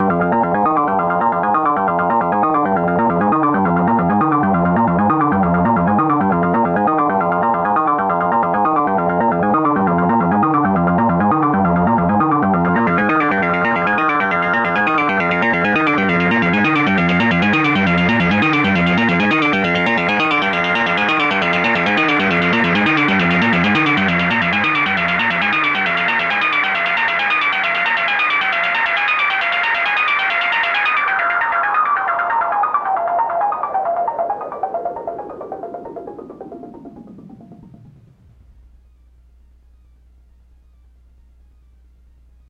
Dual VCO's + filters in a fast sequence.